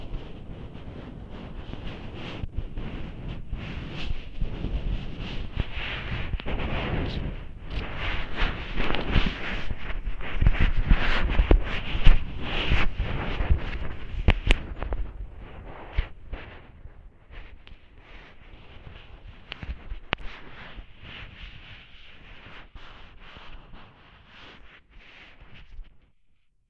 scraping a paintbrush around on the bottom of a bathtub
hydrophone, paintbrush, scraping, washbasin